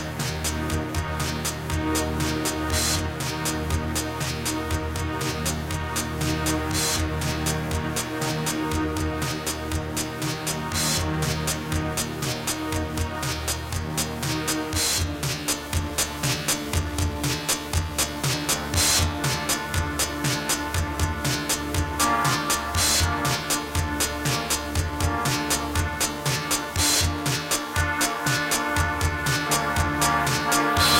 on my way
Rhytm recorded rather lofi on bad equipment and childish bontempi
harsh, lo-fi